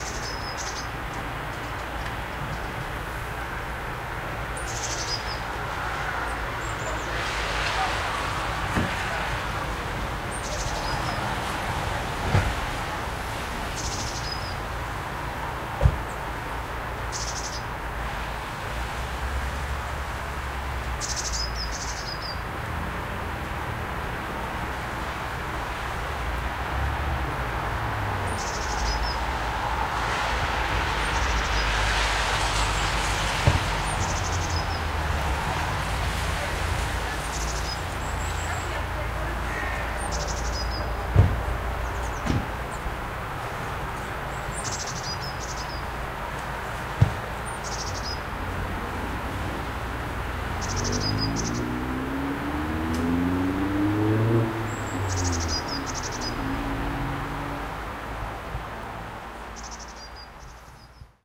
Outside City Nature Ambience Sounds, Birds & Cars
ambiance, ambience, ambient, atmosphere, bird, birds, bird-song, birdsong, calming, city, field, field-recording, forest, general-noise, nature, peaceful, relaxed, singing, sound, soundscape, winter